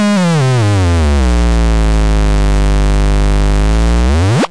This is literally feedback. I plugged the output into the input and squelched the gain up to get this sound....